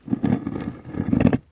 A stereo field recording of a granite rock being slid along granite bedrock. Rode NT-4 > FEL battery pre-amp > Zoom H2 line-in.